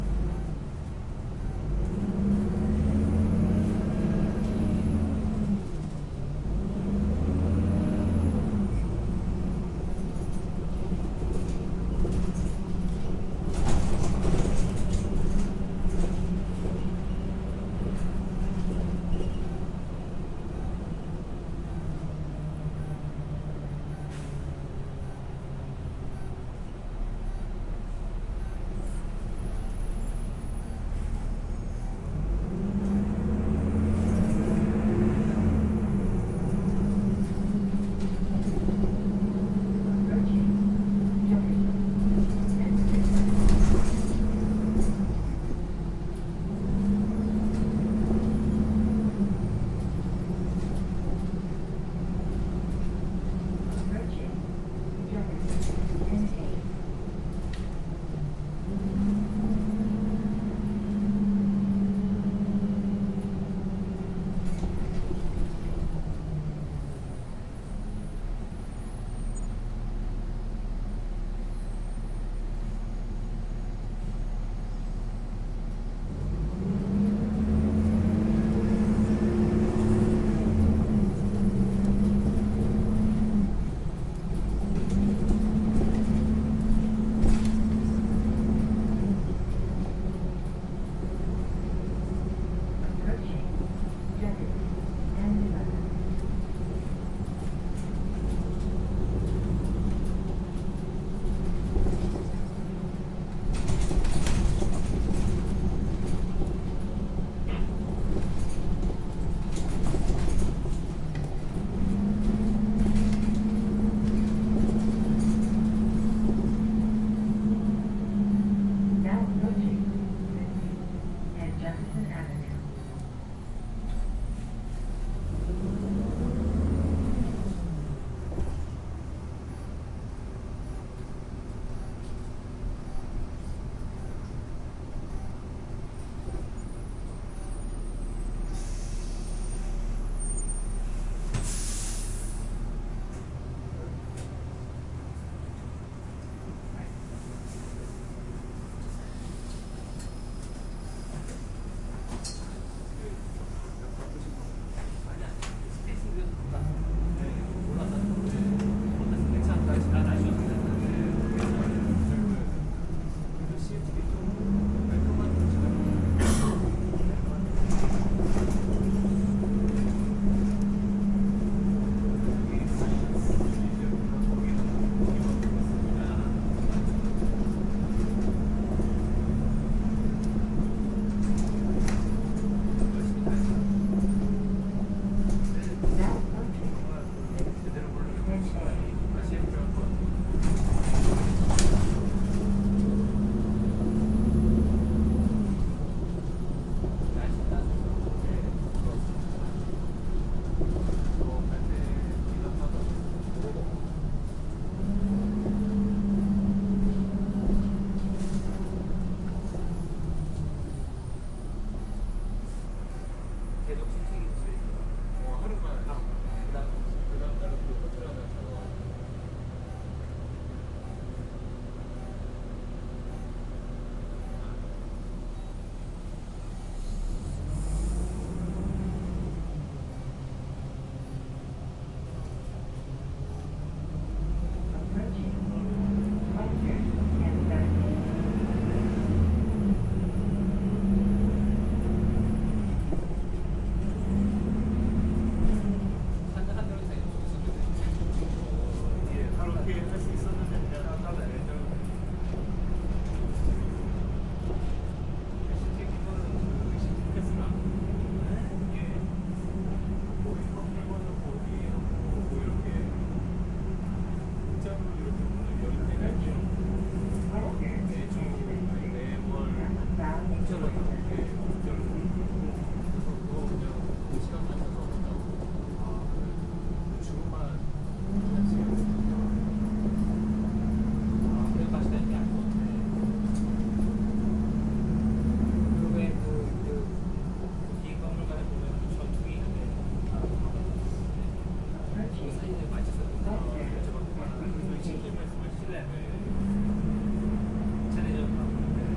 corvallis-bus-ride
iPhone recording of the bus ride home in Corvallis, OR.
bus, corvallis, moving, road, transit, vehicle